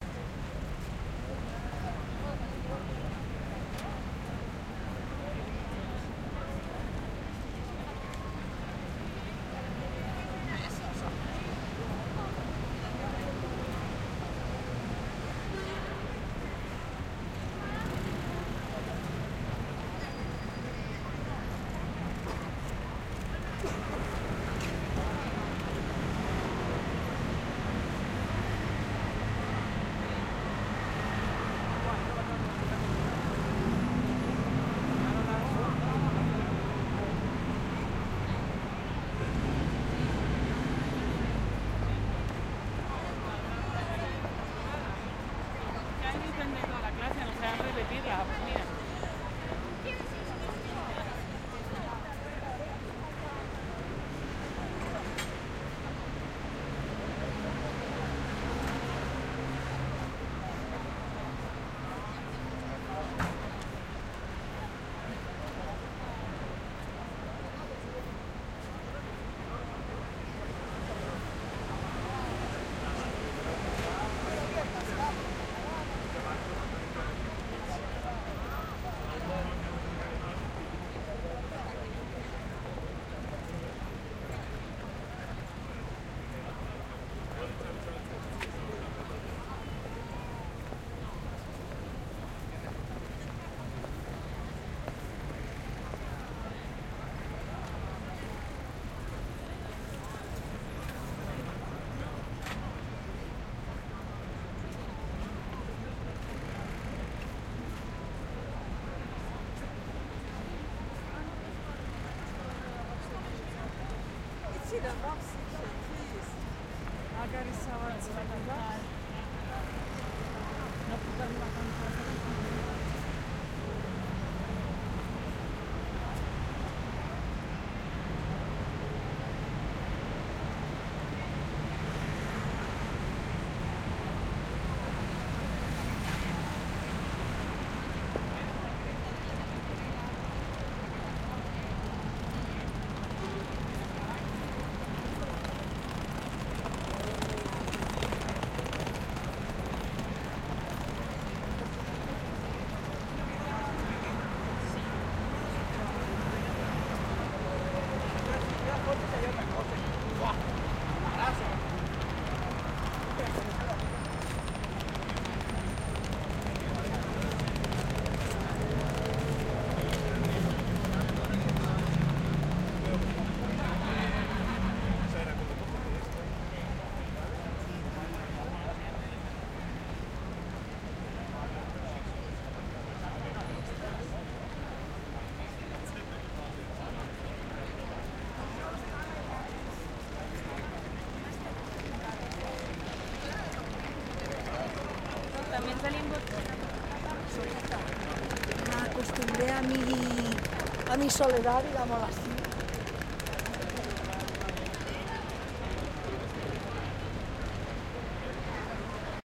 plasa catalunya entrada corte ingles
Plaça Catalunya Entrada Corte Ingles
Ingles, Corte, Entrada, Catalunya, Plasa